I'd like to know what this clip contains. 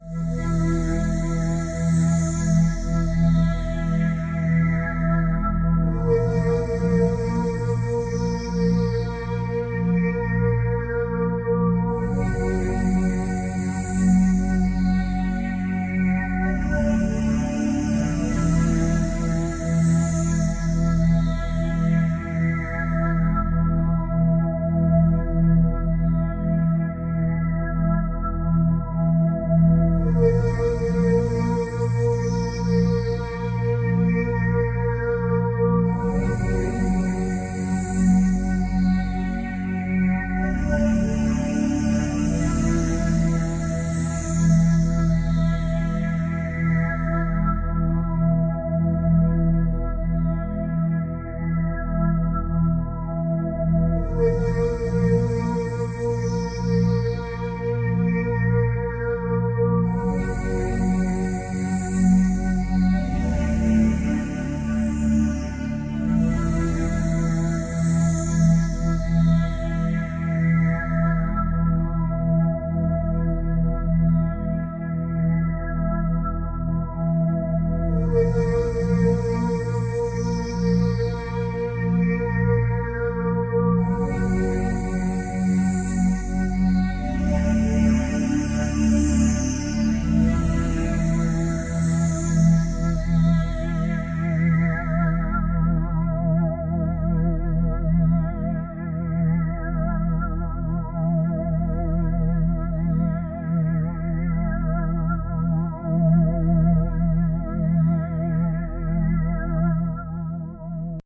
suspense melody based in the mode of myxolydian#11.